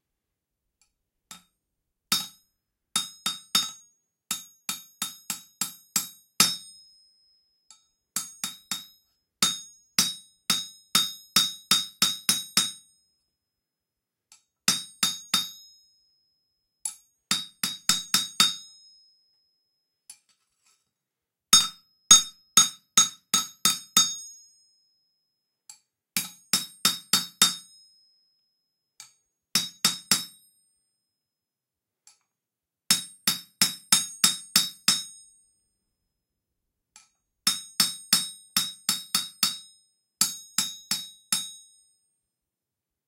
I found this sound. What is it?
Anvil & Steel Hammer 2
Stereo recording. Shaping and flattening a knife blade with a steel hammer on a small anvil mounted on a block of wood. Rode NT > FEL battery pre amp > Zoom H2 line in.
smithy, banging, anvil, hammer, steel, steel-hammer, tool-steel, stereo, metal, tapping, xy